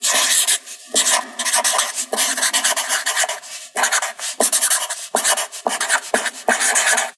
garcia - writing with marker
Writing with a felt marker
drawing, draw, marker, mus152, writing